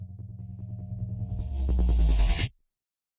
Hypo-Boomput-150bpm
Wobbles, sub, gate, effect, bass, 150bpm, wobble, boom, dubstep, low, dnb, Dub